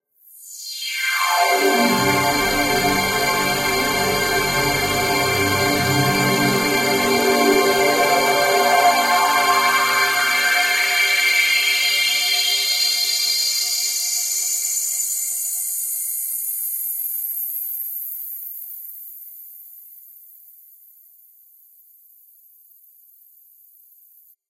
Image Rise
Made This sound playing with the Synth Detunes Over Unison and made it into a riser FX.